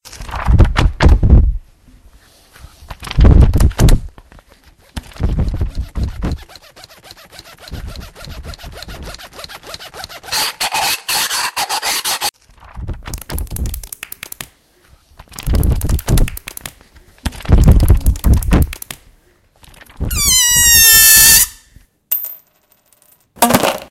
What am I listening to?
Students from Ausiàs March school, Barcelona, used MySounds from Belgian and French students to create this composition.